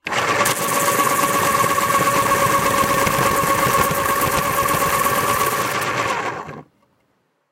New pencil inserted, electric pencil sharpener sharpens for five seconds, pencil removed, motor dies.
sharpener, pencil